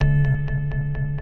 Plunk Slice Short
Slice of sound from one of my audio projects. A plunky sound. Edited in Audacity.
Slice, Effect, Plunk